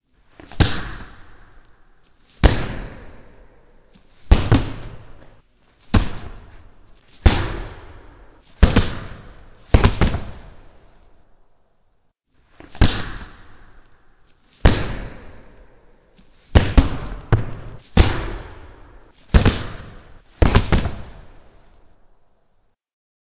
CLARKS Punches Hits heavier big boxing
reverb heavy body blows and falls.
punch, impact, thud, hit